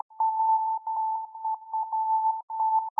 Created with coagula from original and manipulated bmp files.
morse, image, space, code, synth